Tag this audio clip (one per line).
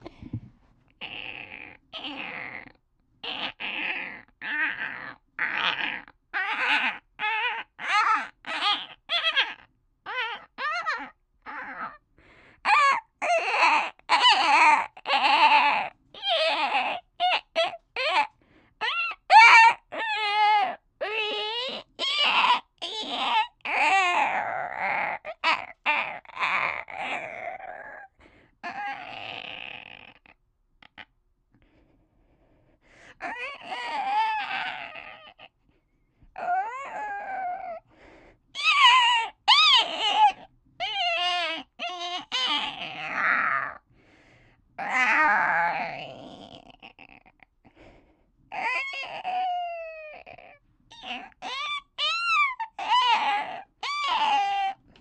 creature
creak
chitter
monster
animal
bug